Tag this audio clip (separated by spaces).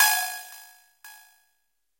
electronic reaktor delayed mallet multisample